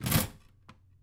kitchen utensils 04
rummaging through a kitchen drawer
recorded on 16 September 2009 using a Zoom H4 recorder
kitchen, cutlery, silverware, rummaging